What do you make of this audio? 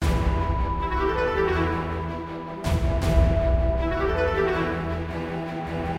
Epic SoundtrackChase
This music clip can best be used as an action movie or gaming sequence. Very dramatic and intense. Was made with Music Maker Jam.